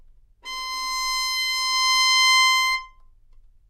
Part of the Good-sounds dataset of monophonic instrumental sounds.
instrument::violin
note::C
octave::6
midi note::72
good-sounds-id::3633